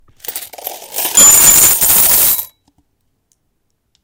Coins being poured
Coins, Money, Sound-effect